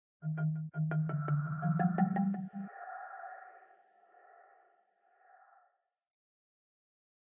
A marimba with multiple effects applied
170bpm, Gritehdehump, Marimba, Warped